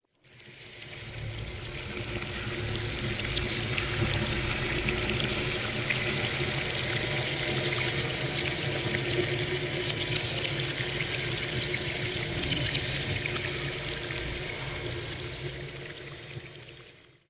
GENDRON clement 2015 2016 DeepWater
sound of a water cave environment with a lot of echo.
It come from a record of water flowing
with a slowdown of the speed
> Reverberation
> Amplification
drops, macabre